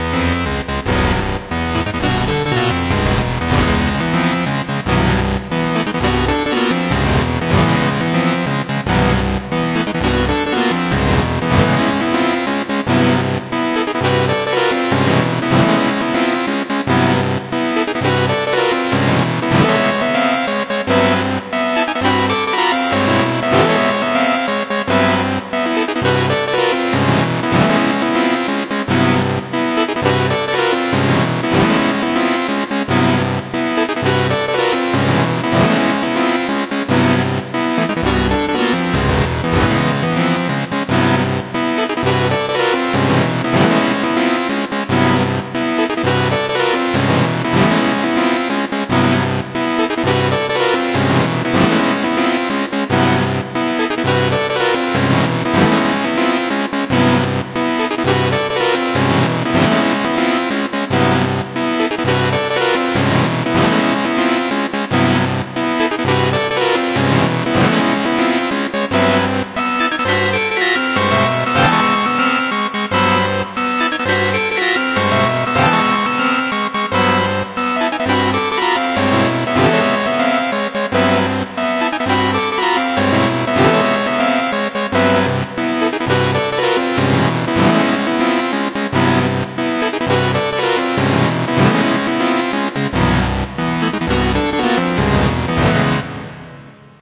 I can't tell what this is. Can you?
A short buzzing melody reminiscent of oldschool videogames that starts out low and grating then gets higher and thinner.
synth-loop, atari, 8-bit, videogames, melody, lo-fi, synth-melody, noisy, noisy-melody, gamesounds, noise, videogame, loop